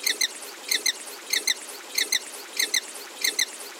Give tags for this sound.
alarm; bird-call; coot; mix

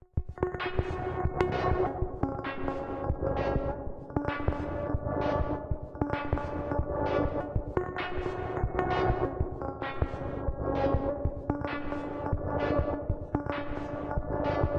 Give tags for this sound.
Bass; beat; Dj-Xin; Drum; Drums; EDM; Electro-funk; House; loop; Minimal; Sample; swing; Synth; Techno; Trippy; Xin

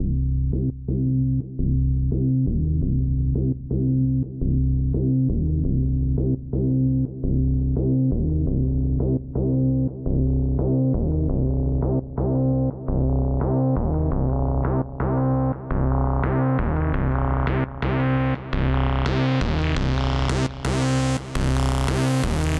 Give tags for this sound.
170-bpm; beat; sequence; progression; synth; distorted; melody; bass; bassline; distortion; drum-n-bass; hard

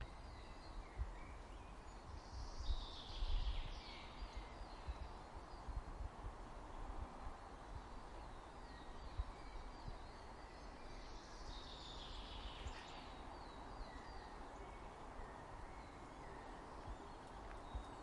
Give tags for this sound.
village; birds; suburban; Moscow

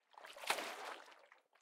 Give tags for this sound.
liquid; splash; water

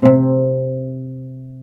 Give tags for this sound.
sample; oud; c3

